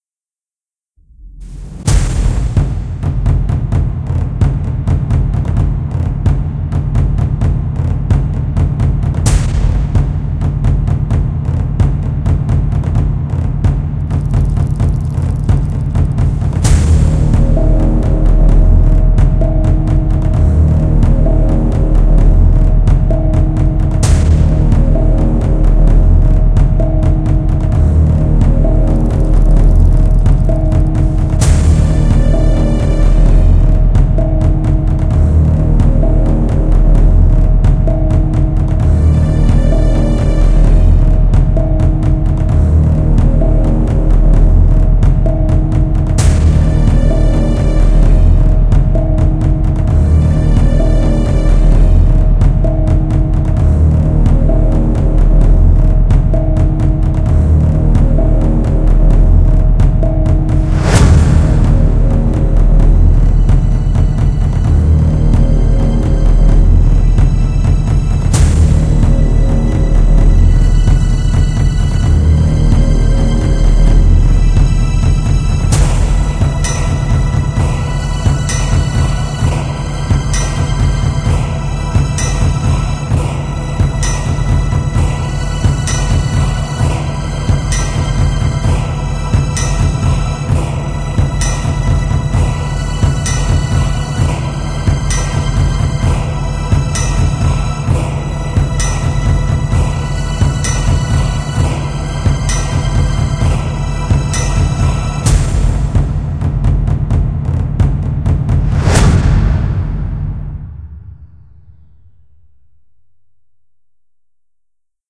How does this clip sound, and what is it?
The story behind the sign of Scorpio (Scorpius) : The Giant Scorpion Hunter vs The Giant Human Hunter.
This is about the battle between Scorpio and Orion. The battle that was so lively, so remarkable, that it even caught the attention of Zeus, the God of the Sky and the Thunder.
Sounds used:
[2020-03-01].
[2020-03-01].
[2020-03-01].
The story:
It all began with the hunter Orion.
Orion was a giant, and a skilled hunter who used to hunt and kill animals. He one day made a bold claim - that he can kill all living creatures on Earth.
Orion threatened to kill all the creatures in the world, most possibly to impress Artemis, the Goddess of the Wilderness, among others.
Gaia, the Goddess of the Earth, heard about this, and felt that the creatures in the world, her children, were in danger.
She had to do something about Orion, and schemed together with Apollo, the God of the Light and the Art, and who was also the twin brother of Artemis, to assassinate Orion.